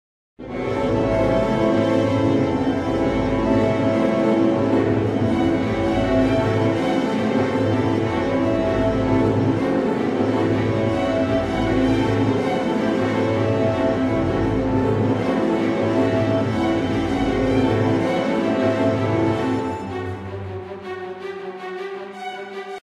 Railway Voyage Blustery Sea
This is the loop from Voyage by Steam where things start to get really unpleasantly rough.